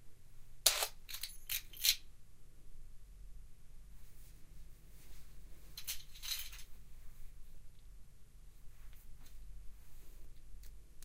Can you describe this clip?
Stereo binaural recording: pick up keys off the table, jingle them in the hand, then put in pocket.
keys, up, pick, foley, pocket, jingle, key, keyring